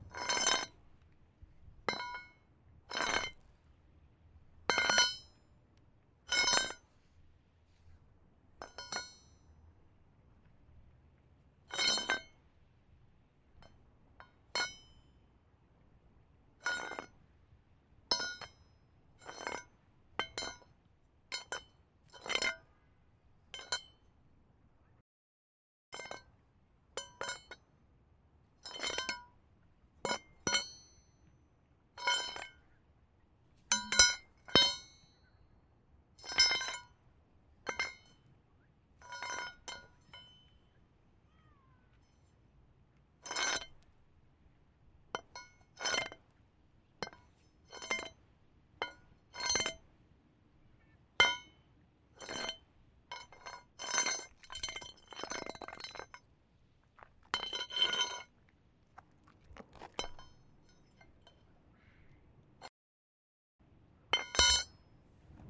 crowbar drop on ground and pickup various
drop, crowbar